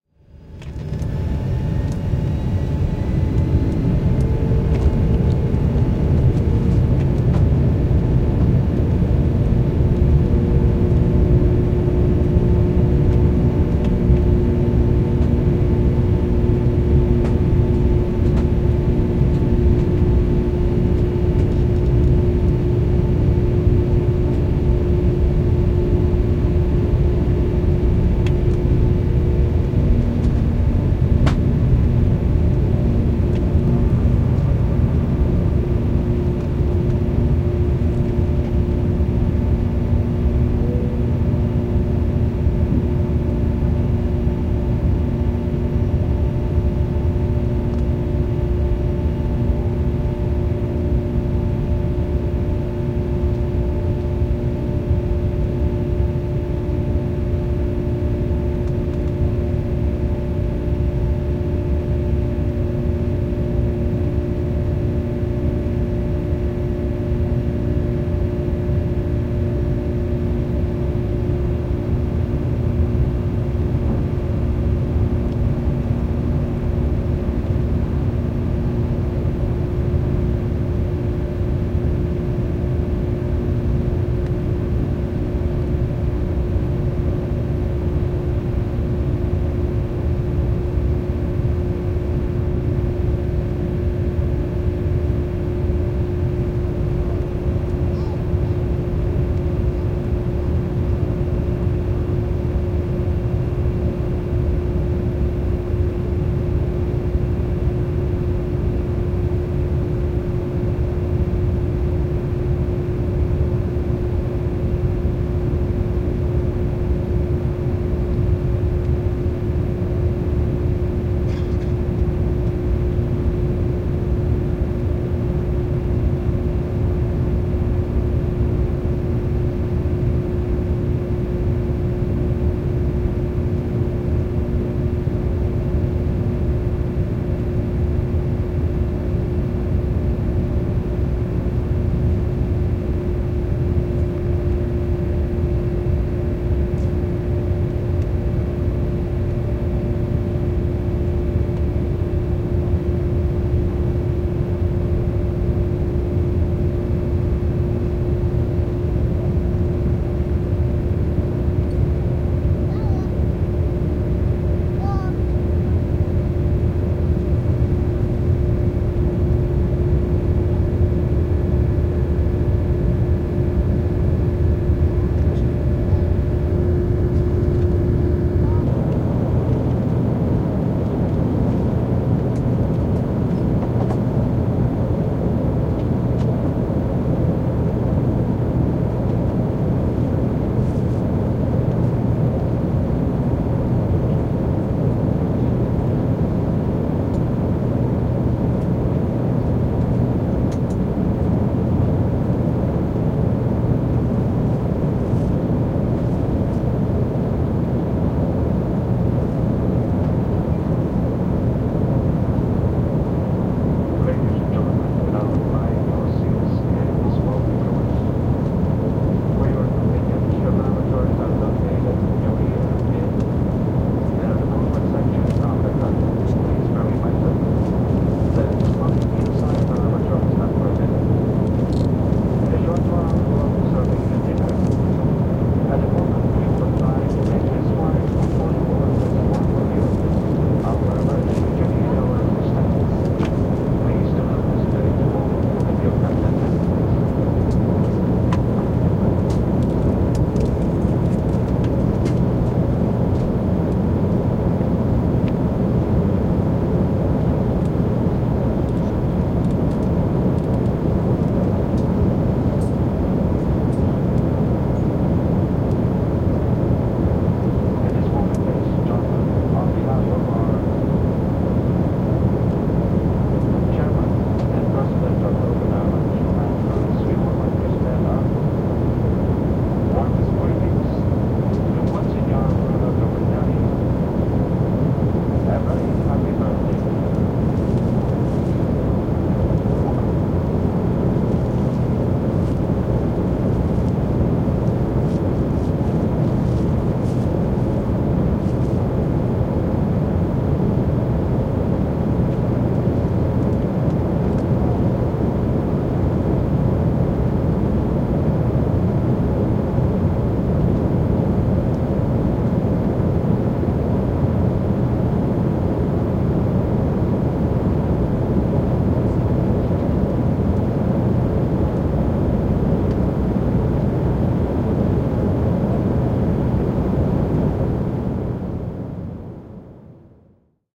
Lentokone, suihkukone, lentoa / Jet aircraft, aeroplane, flight in the cabin, hum of air conditioning and motors, from 3'34" on faint announcements (male, English), interior
Lento, lentoa matkustamossa, ilmastoinnin ja koneen huminaa, 3'34" alkaen vaimeita kuulutuksia (mies, englanti). Sisä.
Paikka/Place: Filippiinit - Hongkong / Philippines - Hong-Kong
Aika/Date: 1985